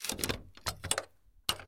Metal Tick - Impact Machine UI
tick, interface, handling, metal, ui, iron, switch